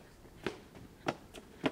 Running Kid Heavy Footsteps
Kid runs with heavy footsteps, outside.
Footsteps, kid